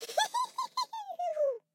monstro feito por humano - human voice
monstrinho, monstro, bichao, bicho, bichinho, monstrao, monster